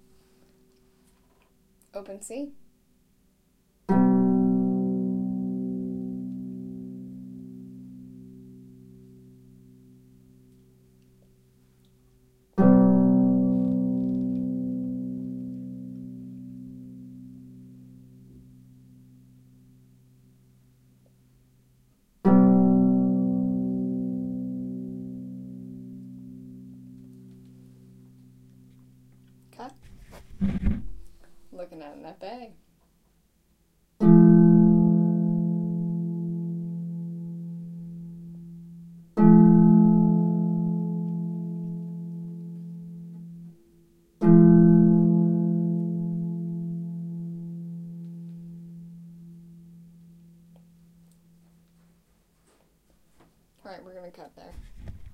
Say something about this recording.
harp chords
Me playing a C chord and F chord